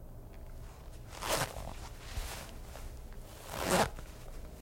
pants zipper zipped and unzipped
A man unzipping and zipping up with pants zipper
fly, male, zipper